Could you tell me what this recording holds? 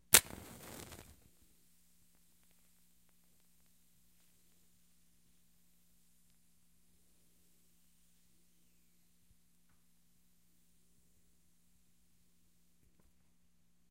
Another match strike with lengthy burn.